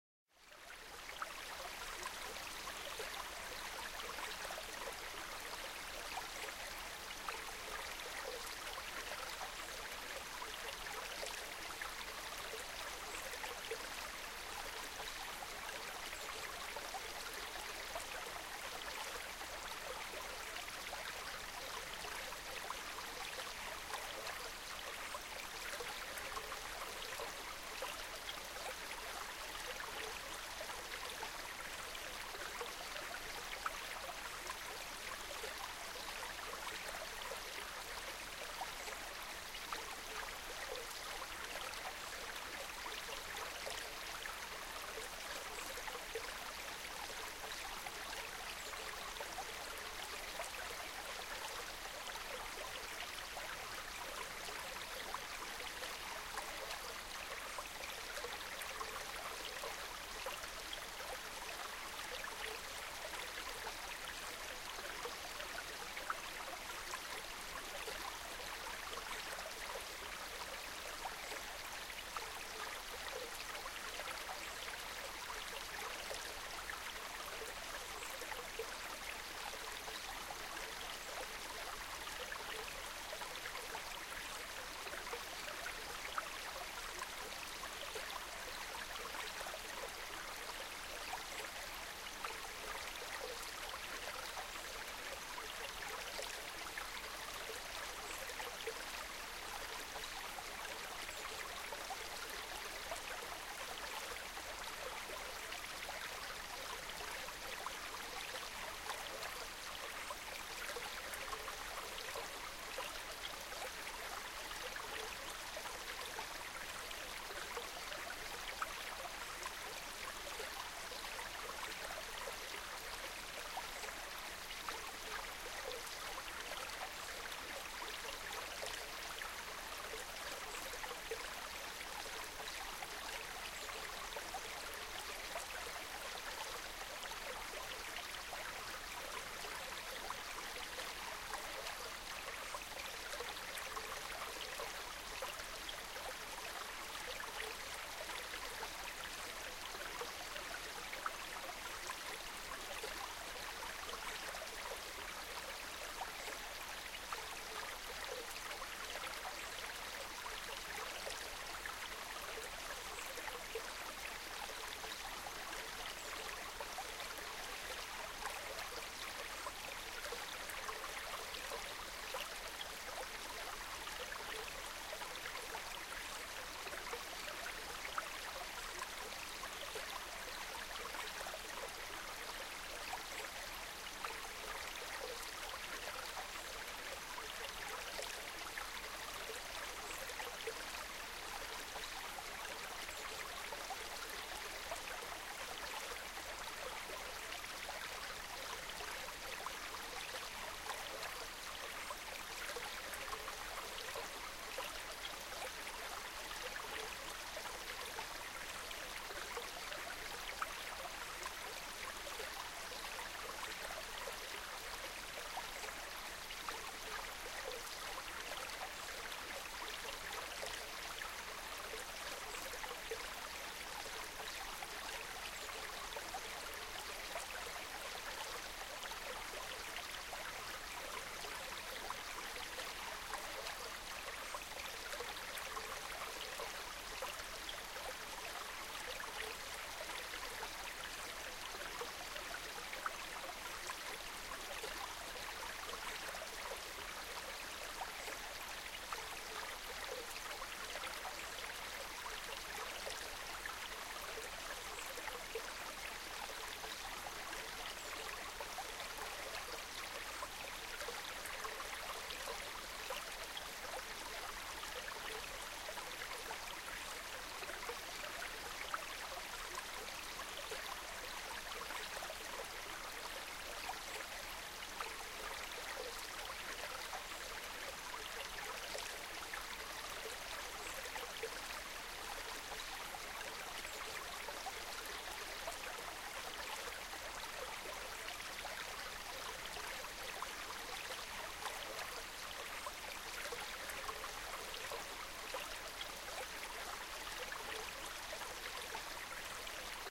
Relaxing soothing sound of a gentle stream. Flowing water Natural sounds
Enhance your well-being and feel more comfortable with relaxing sounds of nature. Running water river stream.
Nature sounds have a positive effect on the mind and body. Bring nature inside - or anywhere you go.
Experience the benefits of nature sounds, all day or overnight.
Promotes rest, peace of mind, and good overall health.